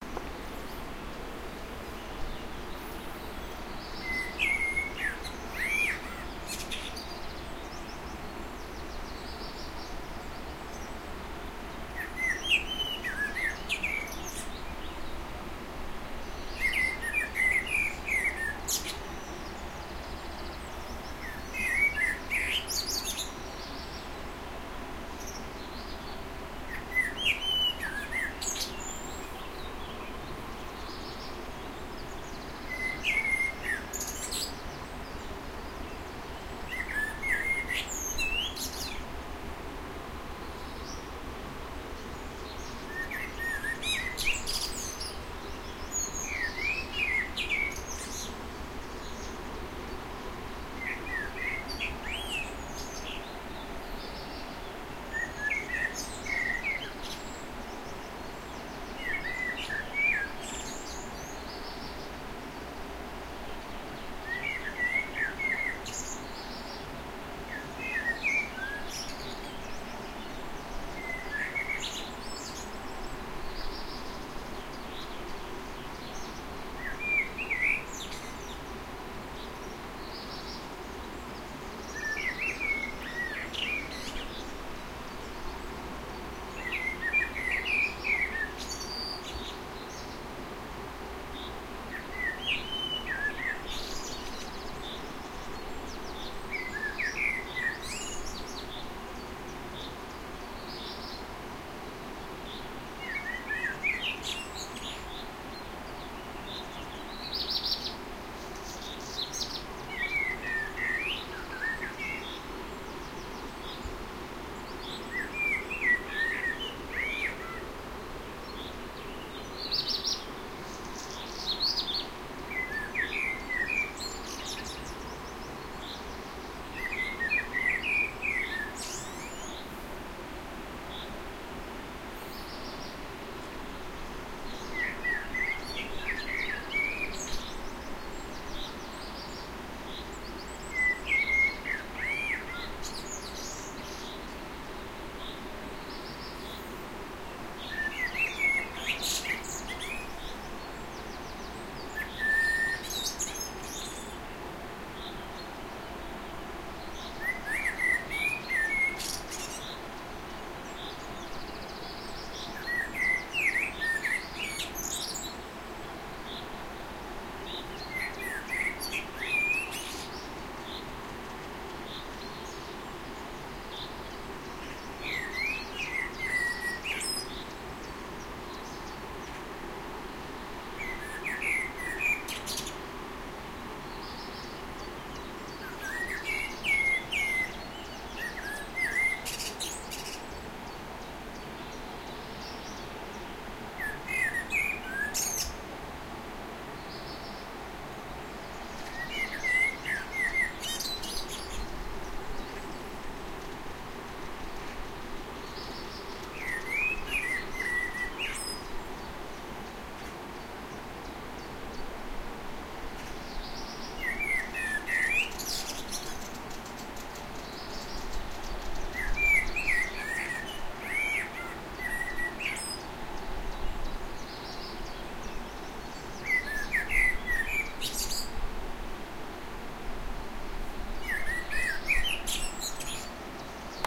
las ptaki szczawnica
Beautiful morning in Polish mountains - Pieniny - the sound of birds...
ambient, birds, birdsong, morning, peace, sing, spring, tweet, woods